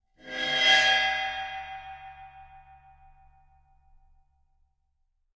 Cymbal recorded with Rode NT 5 Mics in the Studio. Editing with REAPER.
beat, bell, bowed, china, crash, cymbal, cymbals, drum, drums, groove, hit, meinl, metal, one-shot, paiste, percussion, ride, sabian, sample, sound, special, splash, zildjian